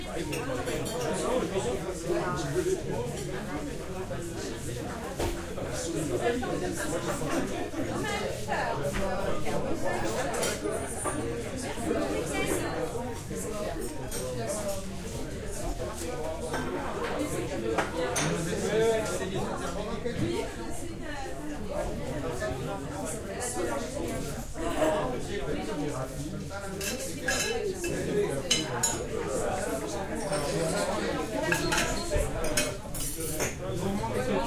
Cafe ambiance. Recorded at Cafe Boursault in Paris with an M-Audio Audiotrack